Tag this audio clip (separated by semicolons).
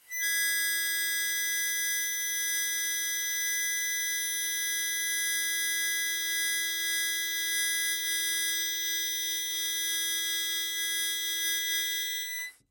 e; harmonica; key